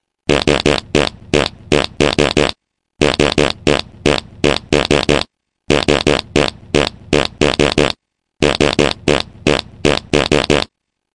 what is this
fart SOS
A Fart sounding an SOS